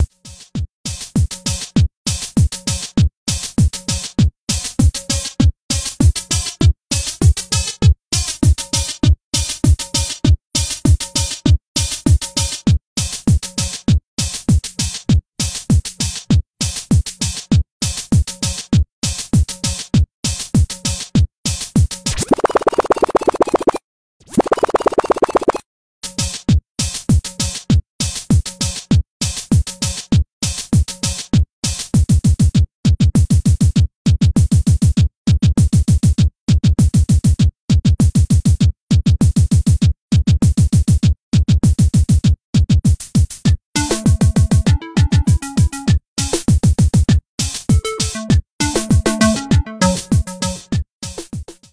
new short mixbeat recorded from rise track, oryginal beat links below:
loop, 100-bpm